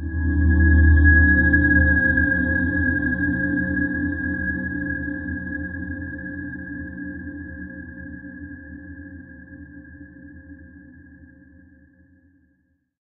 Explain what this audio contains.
This drone consits of mostly higher frequencies.This sample was created using the Reaktor ensemble Metaphysical Function from Native Instruments. It was further edited (fades, transposed, pitch bended, ...) within Cubase SX and processed using two reverb VST effects: a convolution reverb (the freeware SIR) with impulses from Spirit Canyon Audio and a conventional digital reverb from my TC Electronic Powercore Firewire (ClassicVerb). At last the sample was normalised.
deep-space, long-reverb-tail